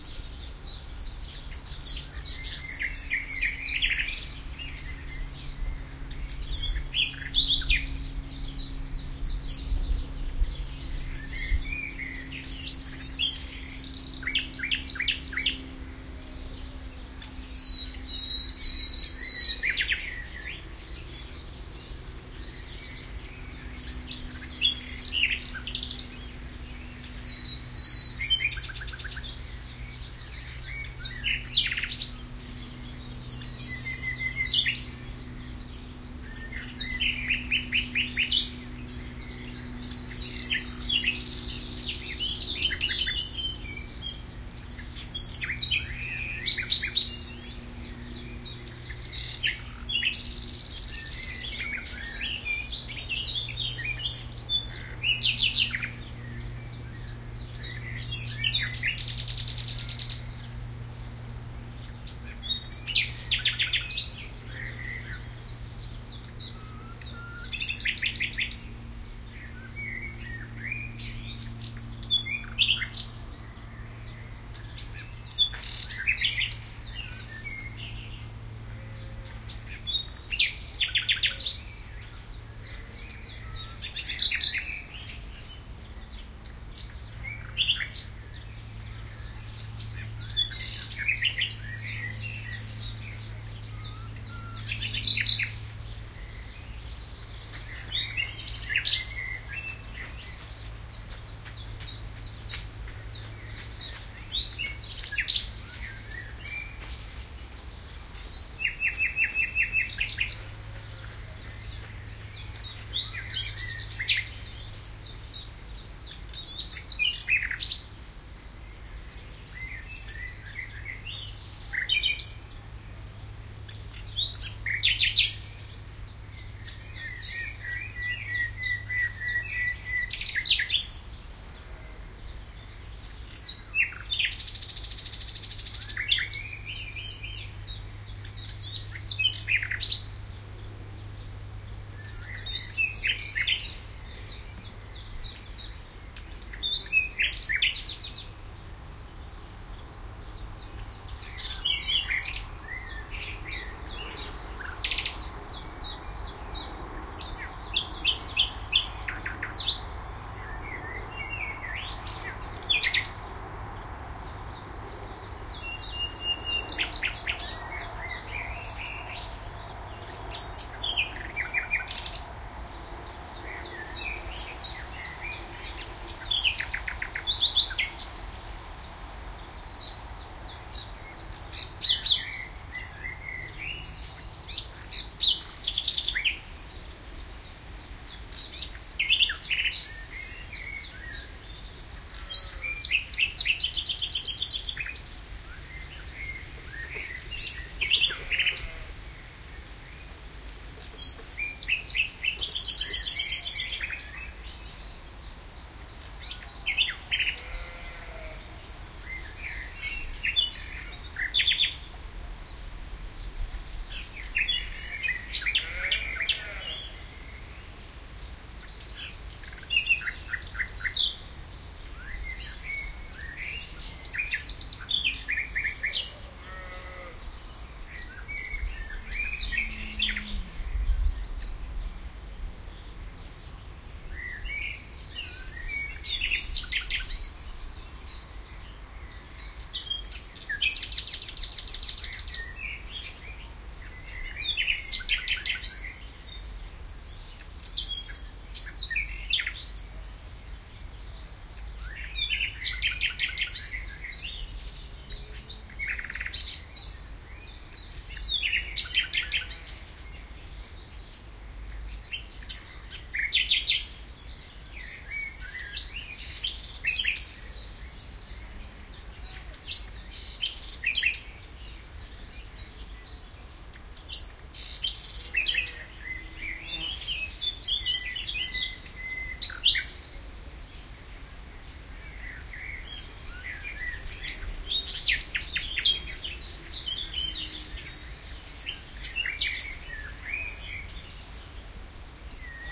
Nightingale song
Well, I gave it another try and managed to record another nightingale, this time near the river Leine. One can also hear a bloke running by, a distant train and some other birds, like a blackcap and a blackbird.
This nightingale was singing its song in the evening in the undergrowth next to a river in the south of Hannover / Germany, on the 20th of May 2007. I managed to record all this with the Sony HiMD MiniDisc Recorder MZ-NH 1 in the PCM mode and the Soundman OKM II with the A 3 Adapter.